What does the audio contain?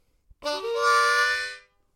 Harm Rip&Bnd Wha
Harmonica tones and variations of chords.